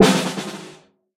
This snare was recorded by myself with my mobilephone in New York.